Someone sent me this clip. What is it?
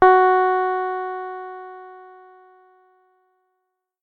009-JEN Pianotone -F#4
Jen Pianotone 600 was an Electronic Piano from the late 70s . VOX built a same-sounding instrument. Presets: Bass,Piano and Harpsichord. It had five octaves and no touch sensivity. I sampled the pianovoice.